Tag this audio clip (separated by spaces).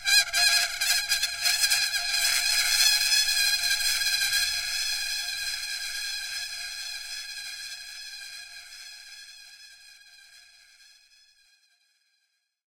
abuse screech piano dry scratch torture ice